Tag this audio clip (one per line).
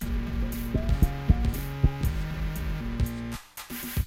Hip
Lofi
Casio
Hop